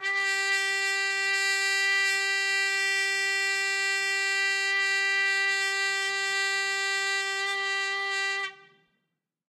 One-shot from Versilian Studios Chamber Orchestra 2: Community Edition sampling project.
Instrument family: Brass
Instrument: Trumpet
Articulation: straight mute sustain
Note: F#4
Midi note: 67
Midi velocity (center): 95
Room type: Large Auditorium
Microphone: 2x Rode NT1-A spaced pair, mixed close mics
Performer: Sam Hebert
midi-note-67, trumpet, straight-mute-sustain, single-note, brass, midi-velocity-95, vsco-2